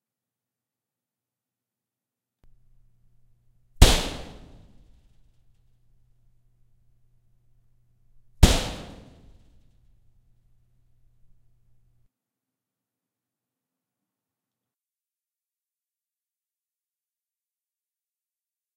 Two punches to a perforated metal wall used in a theater for sound deadening.